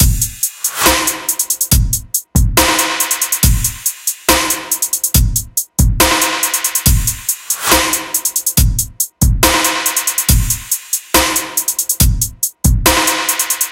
Dubstep beat #3 (140 BPM)
Beat created with Logic 9 on Mac. Using VST(s) and Logic effects. Hats and snares are from EZ Drummer and Logic instruments. Mixed and mastered on the same software with Ozone 4.
Hi, i'm an amateur Dubstep producer,
Please come and check my tracks, give feedback if you want. And if you use one of my loops please give me some credit. Everything is free, and will always be. So if you play the game i'll create more and more :). Here is a beat i created ! Enjoy <3
Love
140, drum, dubstep, free, loop, royalty